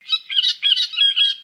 samples in this pack are fragments of real animals (mostly birds)sometimes with an effect added, sometimes as they were originally
funny, sonokids-omni